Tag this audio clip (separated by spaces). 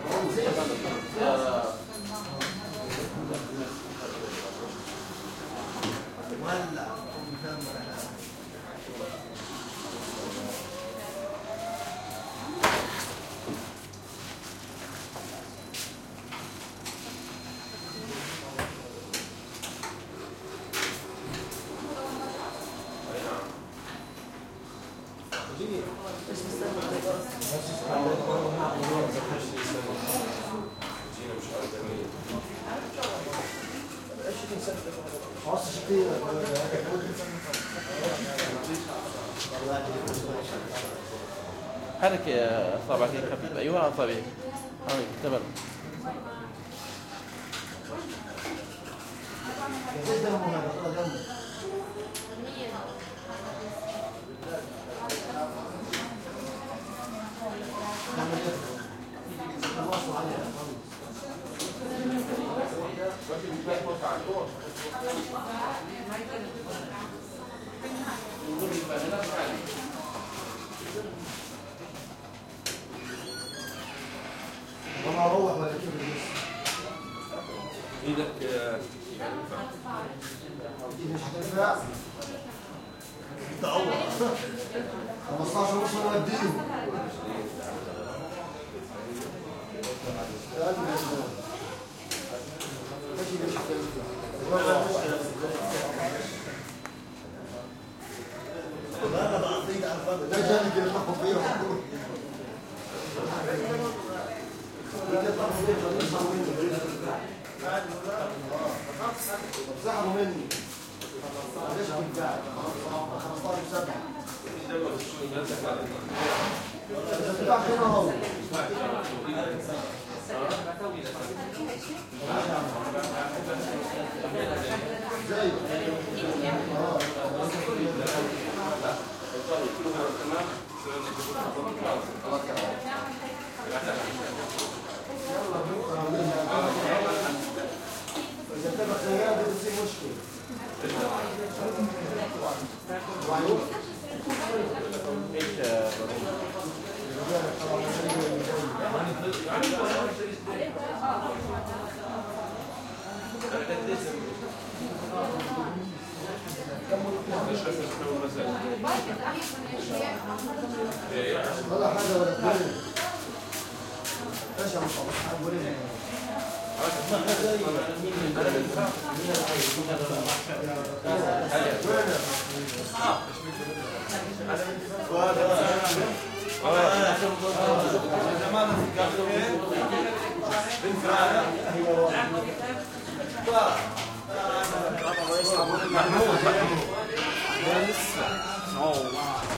arabic blood medical test hospital active